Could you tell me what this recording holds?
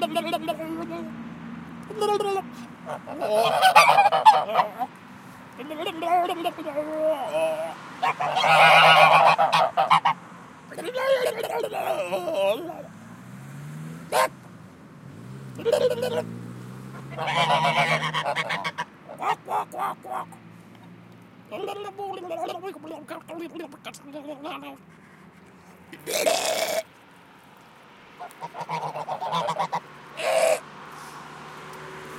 a very funny recording with some ducks